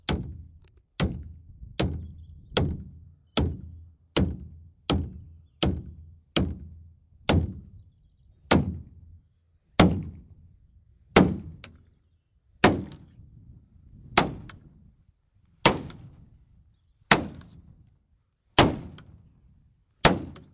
Plastic Drum Thuds Various
Bang, Boom, Crash, Friction, Hit, Impact, Metal, Plastic, Smash, Steel, Tool, Tools